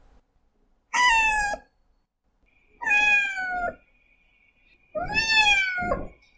Cat Crying
Recording of a cat meowing or crying. The recording mic used was a blue snowball mic.
Cat photo
cat, cry, meow, meowing